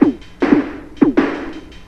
HM Loop 1
dance, industrial, minimal, simple, techno